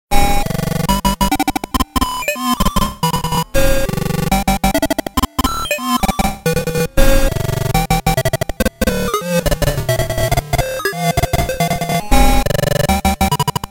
A quick glitch sound I made.